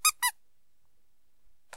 A squeak toy my dog tore up. Sqeaked in various ways, recorded with a BM700 microphone, and edited in audacity.
dog, bm700, squeak, toy